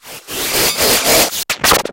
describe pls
an angry synthesized dog and cat going at it.
TwEak the Mods
glitch, bass, synth, beats, acid, leftfield